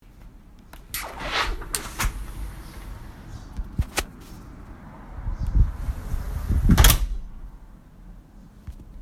opening and closing window
closing, opening, window